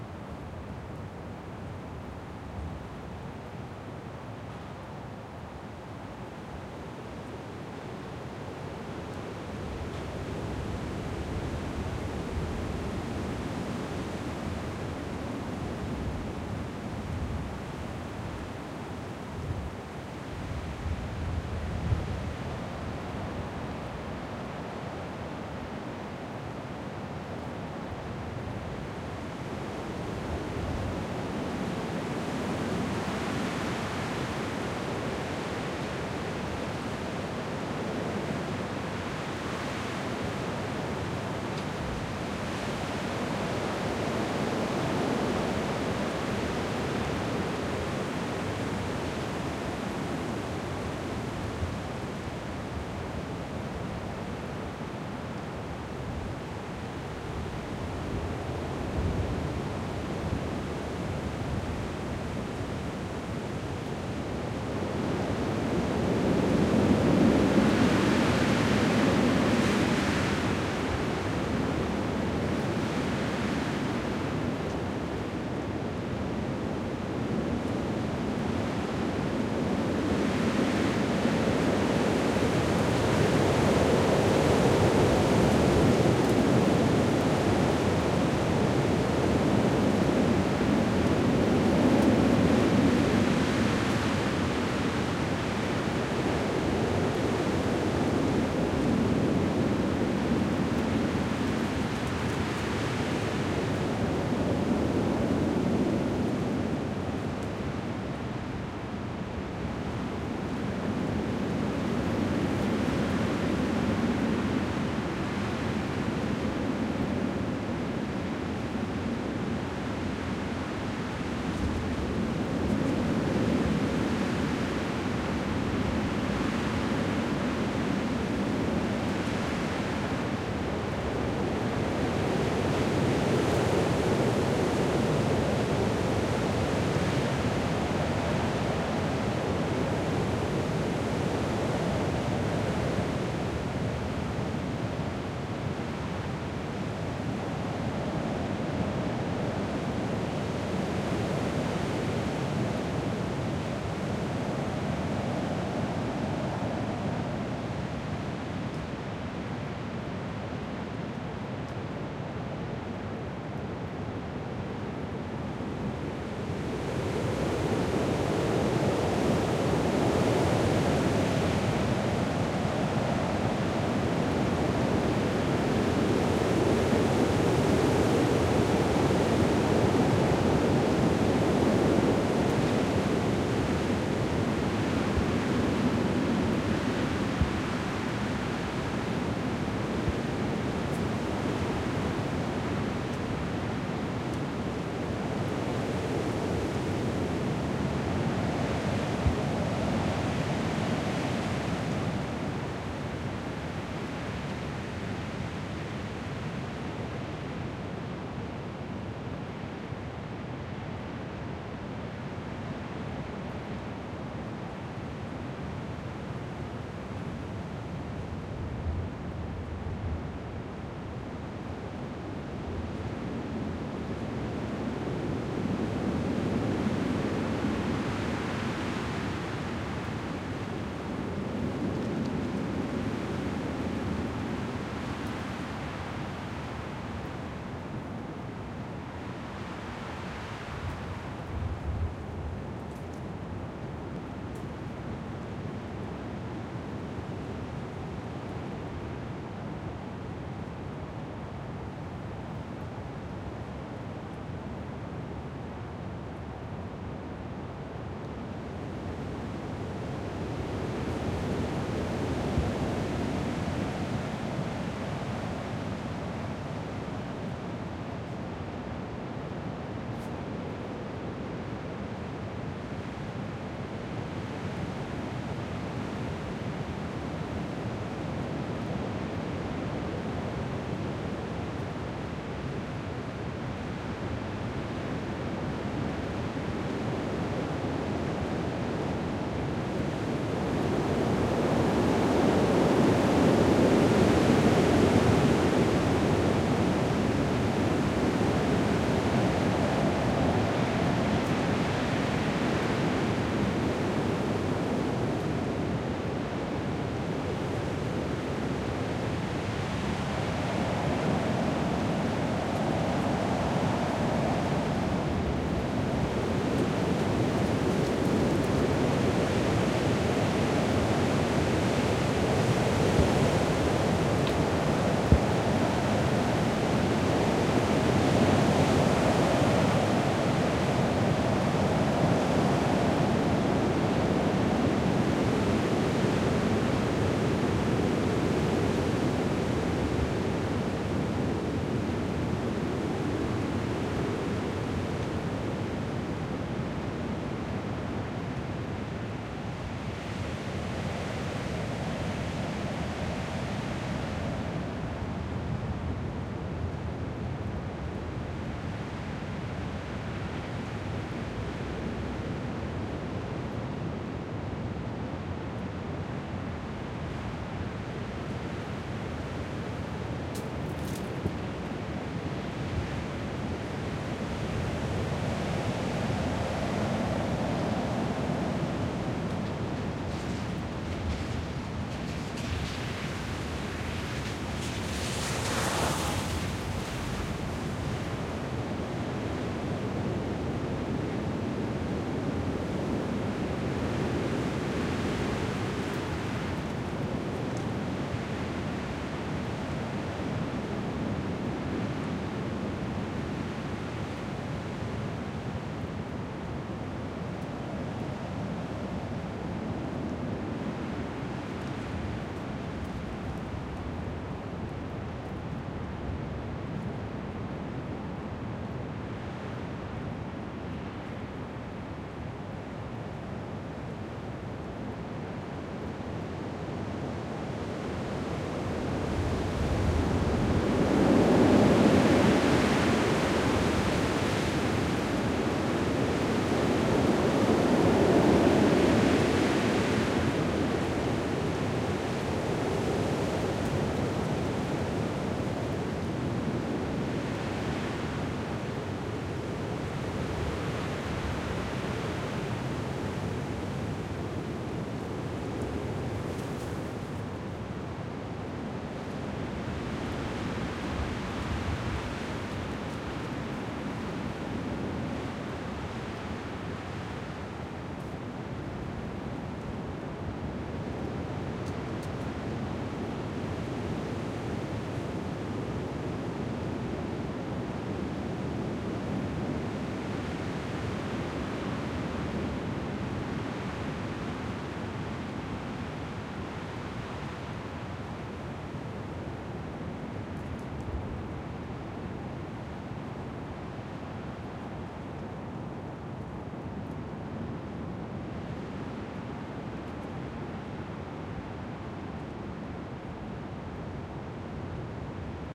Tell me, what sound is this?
wind strong winter through big maple trees with no leaves in suburbs BIG Montreal, Canada
wind, big, through, trees, strong, maple, winter